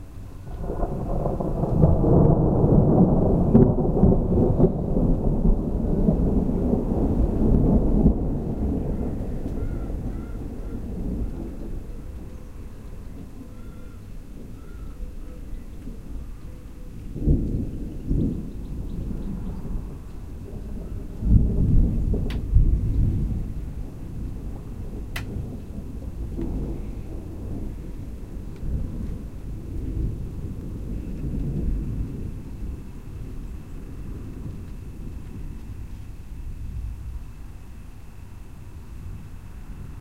Binaural recording of thunder rolling around hills. Panasonic mics to MD walkman.